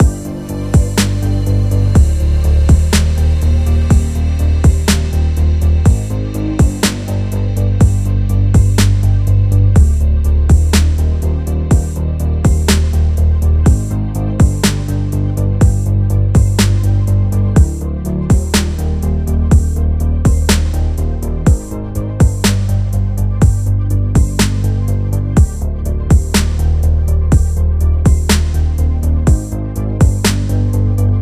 This is a trap infused synthwave 16 bar loop which I did with no purpose, so maybe you can find it useful for your #cyberpunk or #gaming project. Enjoy!